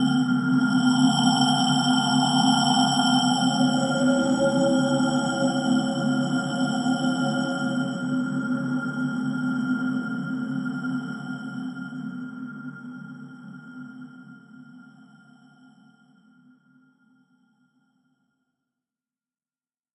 explosion beep kick game gamesound click levelUp adventure bleep sfx application startup clicks
adventure, application, beep, bleep, click, clicks, explosion, game, gamesound, kick, levelUp, sfx, startup